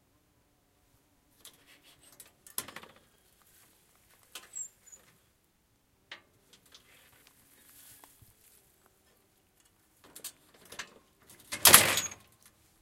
Opening and closing a gate 2
Opening and closing a gate.
creak, open, door, gate, hinge, opening-gate